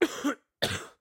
This is one of many coughs I produced while having a bout of flu.
Cough, Flu, Sickness